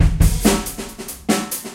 Rock beat loop 14 - fast
A medium fast beat with hi-hat backbeat.
Recorded using a SONY condenser mic and an iRiver H340.
backbeat, hihat, fast, beat, drum